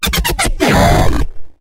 Hero Death 00
An 8-bit hero death sound to be used in old school games. Useful for when running out of time, dying and failing to complete objectives.